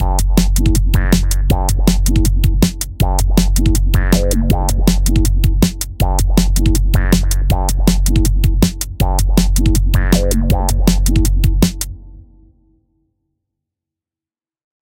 DnBbassline160bpm+beat
Dark, acidic drum & bass bassline variations with beats at 160BPM
160bpm, acid, bassline, beat, dark, dnb, lfo